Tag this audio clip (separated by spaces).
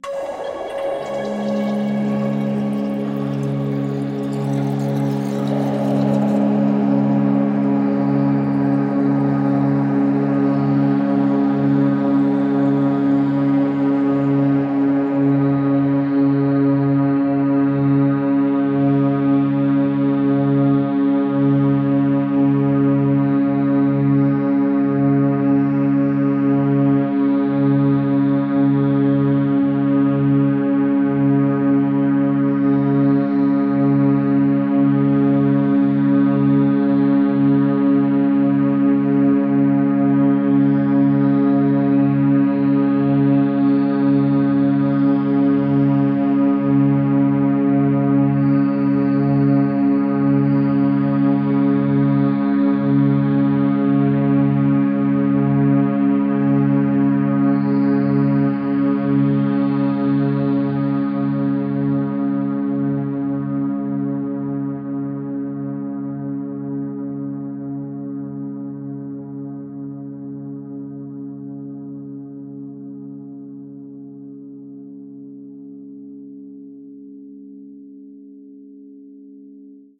multisample pad artificial space drone soundscape water